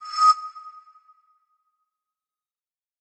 Sounding commands, select, actions, alarms, confirmations, etc. Perhaps it will be useful for you. Enjoy it! Please, share links to your work where
this sound was used.